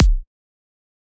Good kick for techno, recorded with nepheton in Ableton.
kick, studio